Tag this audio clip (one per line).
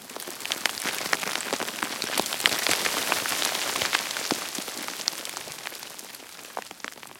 rubble
fall
rock